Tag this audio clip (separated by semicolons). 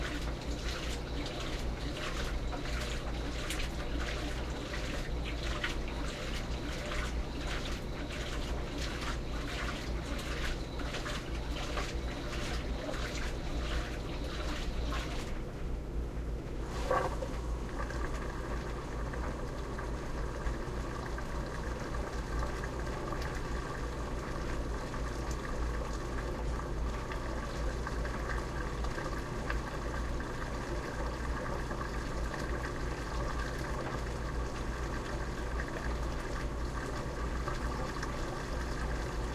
mechanical; washing; machine